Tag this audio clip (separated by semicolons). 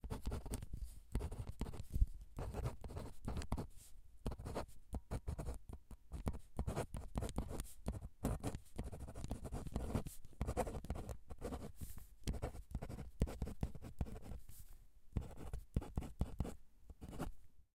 scratch; scribble; ink; paper; written; scratching; pen; write; rustle; right-to-left; wide; stereo; writing